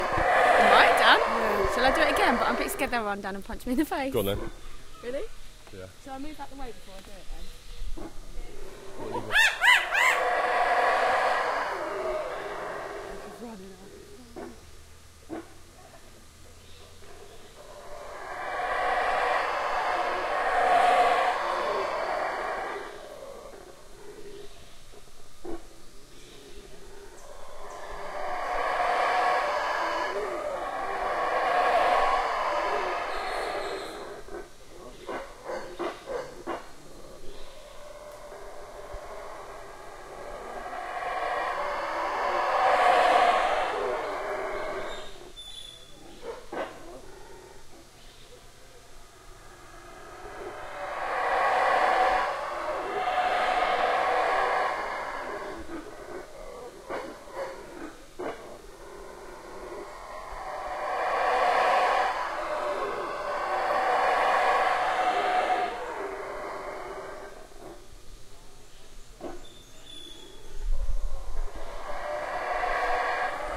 Howler monkeys recorded in the jungle in Polenque, Chiapas, Mexico. Sorry but I haven't processed these files at all. Some of these are with the mics in a 90degree X-Y config and some in a 120degree X-Y config.